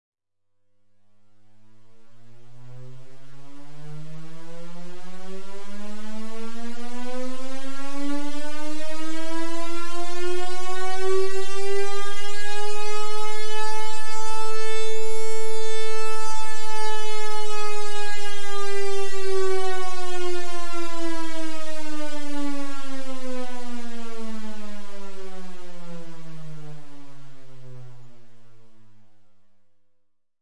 Long Air Raid Siren
A long vintage disaster or air raid siren.
siren, Alert, alarm, air-raid, raid, federal, civil, tornado, Attack, horn, air, defense, emergency, warning, disaster